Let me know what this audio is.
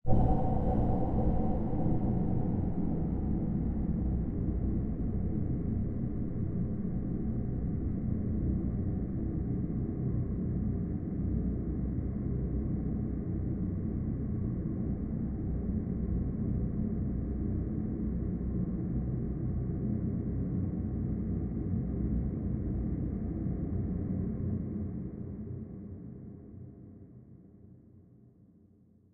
Result of a Tone2 Firebird session with several Reverbs.
drone, reverb, ambient, dark, atmosphere, experimental